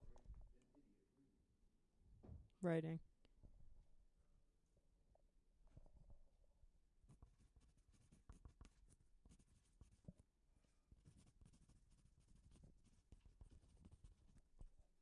writing with a pencil